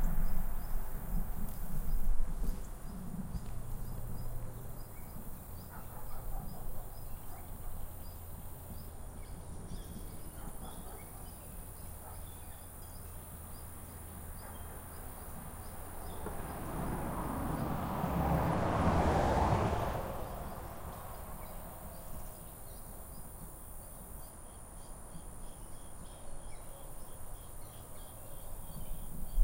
Just another 28 second or so clip of traffic passing over the bridge. There is a little handling noise in this clip. I'll have to work on making a boom pole to prevent so much handling noise.Recording chain: AT822 -->Sony hi Mini Disc Recorder.